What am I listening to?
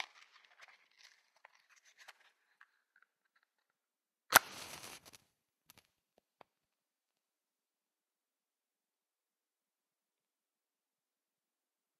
Match - Get and light
A match taken from the box, light and burned.
80bpm, tools, steel, explosion, ignition, 4bar, metalwork